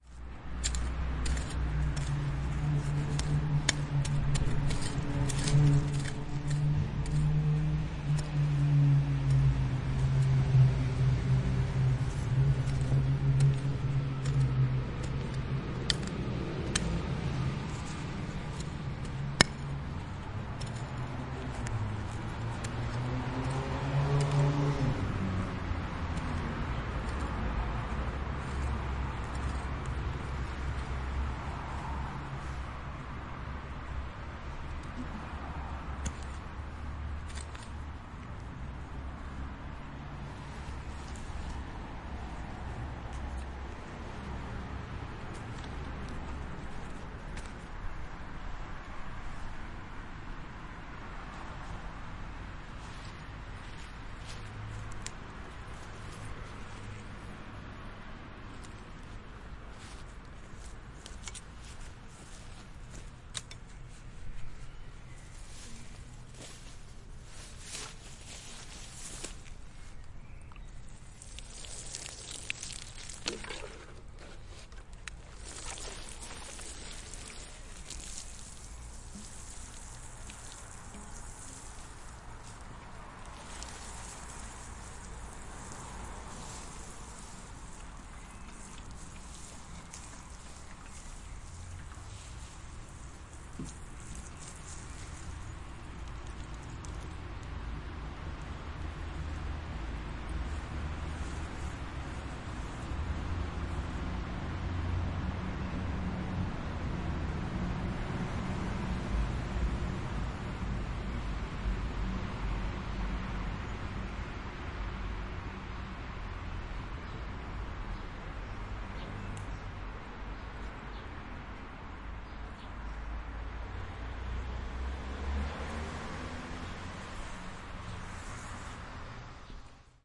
Recorded in a garden next to a house and a street in a city. You hear the earth being plowed and watered and sometimes a car passes by.